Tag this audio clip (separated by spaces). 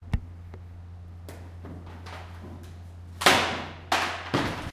france rennes soncisnaps